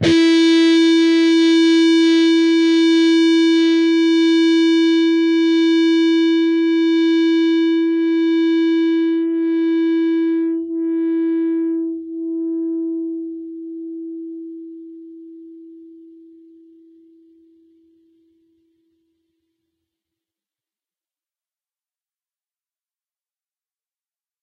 Dist sng E 6th str 5th frt Hrm
E (6th) string, 5th fret harmonic.